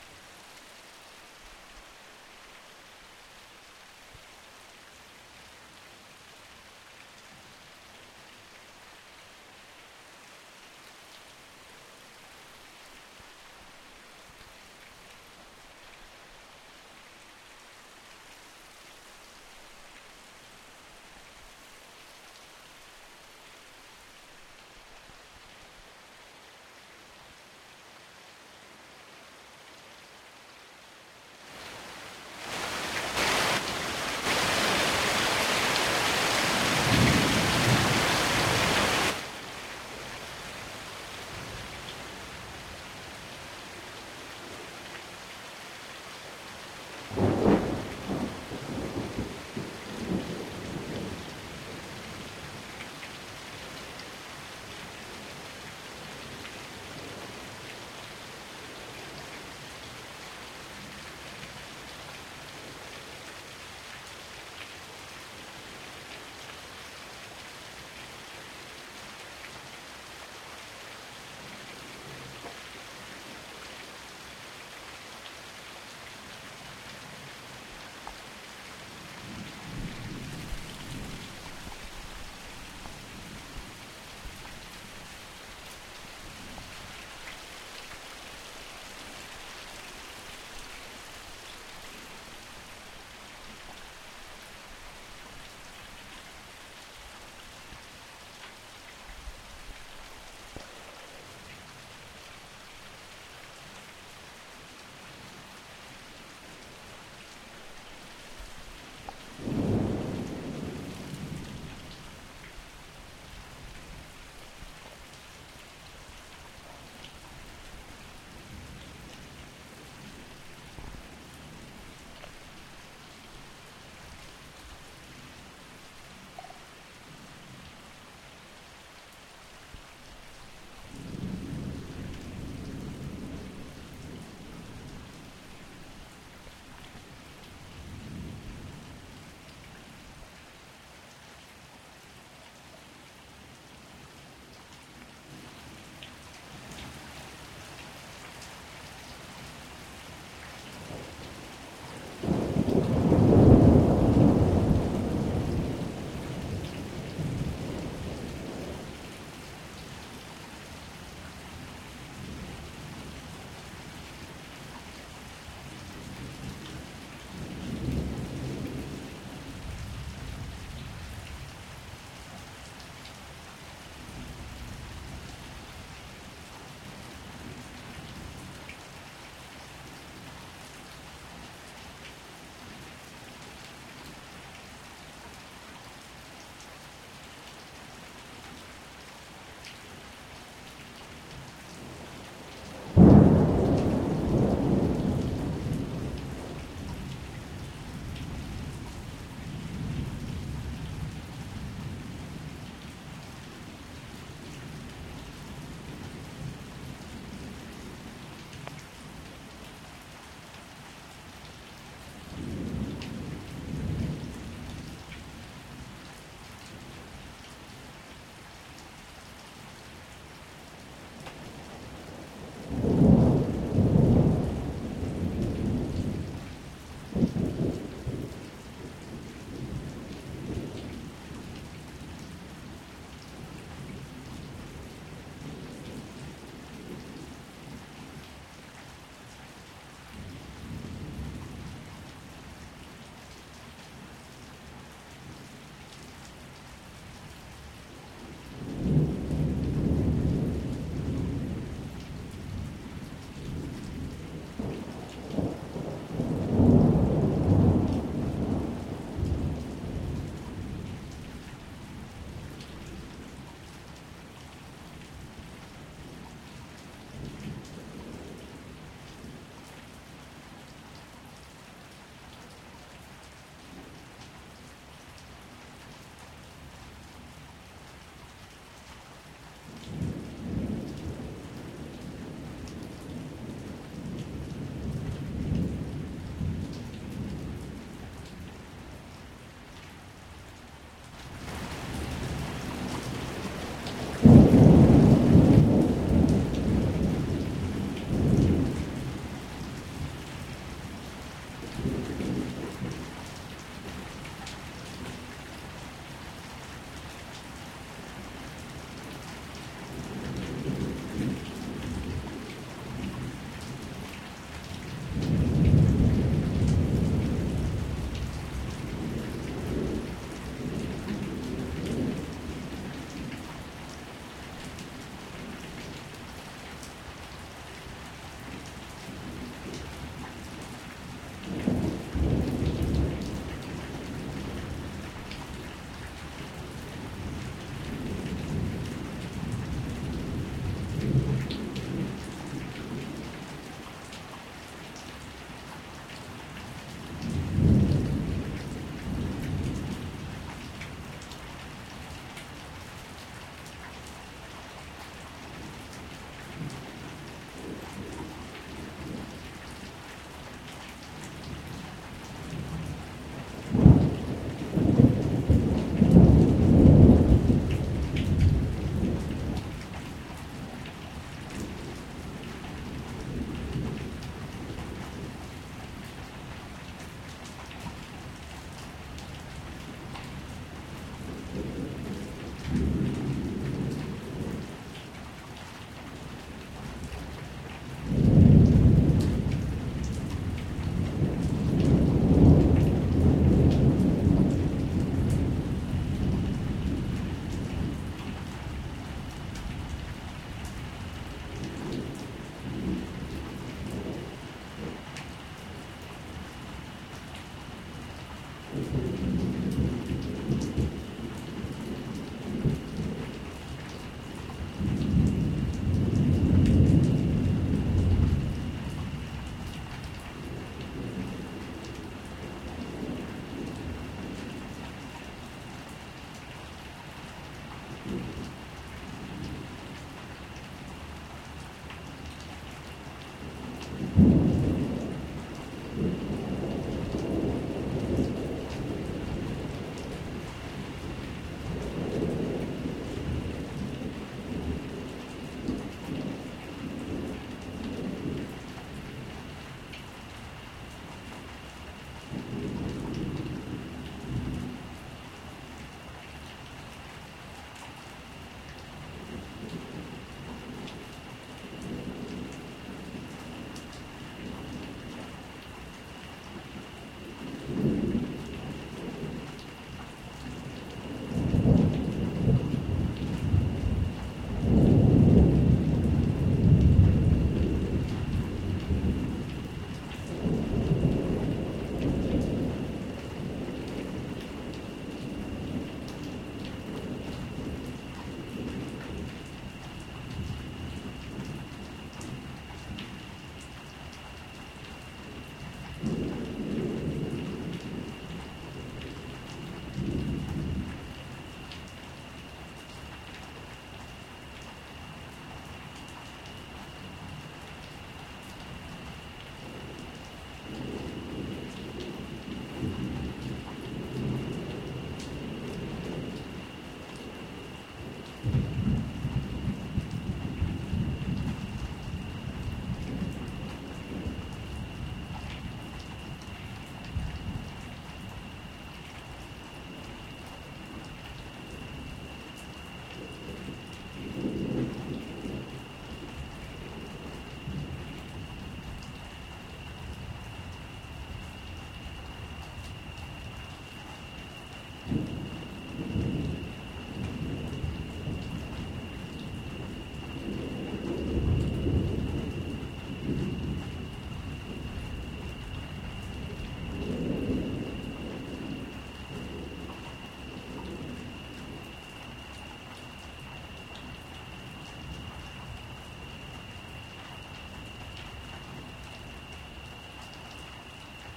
Recorded with a shotgun mic from my porch just after the peak of an intense storm in central florida. The sound of dripping water is heard from different angles with some occasional nice rumbling thunder in the distance.
night, rain, storm, thunder